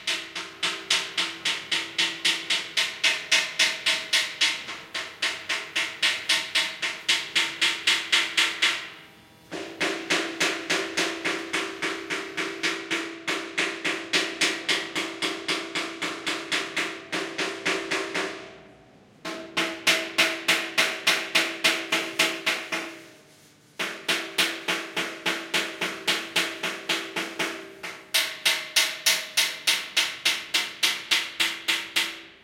Hit a metalpipe and listen